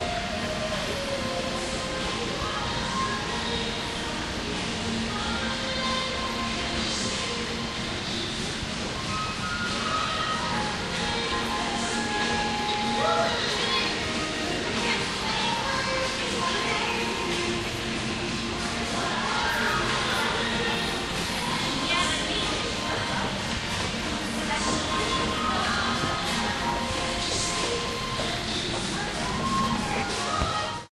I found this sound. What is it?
movie courtyard lameride
A trip to the movies recorded with DS-40 and edited with Wavosaur. Riding the escalator outside the theater before the movie.